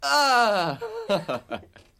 Male Screaming (Effort)